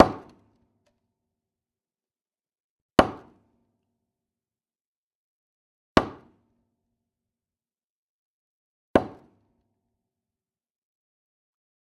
Wood - Steel on Wood 4
Tree stump hit four times with a hammer.
tree-stump, woodwork, tools, wood, hammer, 4bar, impact, hit